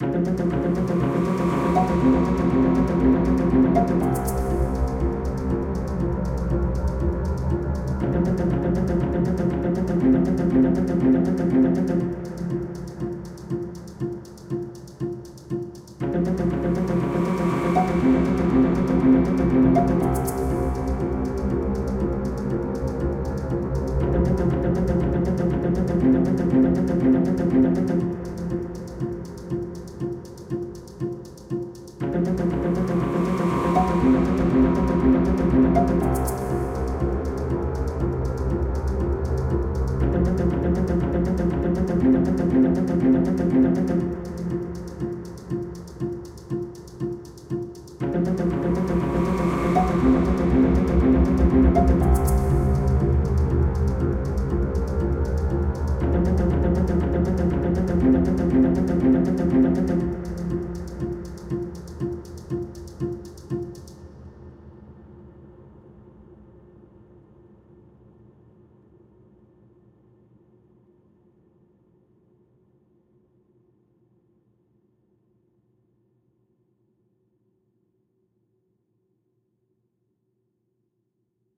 Orchestral Suspense Loop 3
Free!
120 BPM loop. Suspenseful and dramatic.